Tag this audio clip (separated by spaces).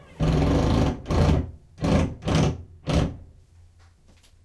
deep drilling wall